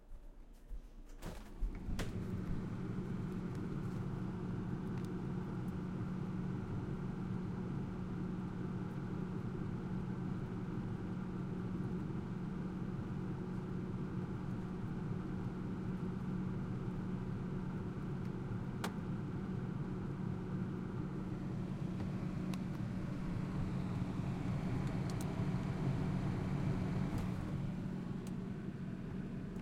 My air conditioner, recorded with my PCM-M10 field recorder. Used as the basis for many sci-fi and creepy ambient sounds.
ambiance, ambience, ambient, background, white-noise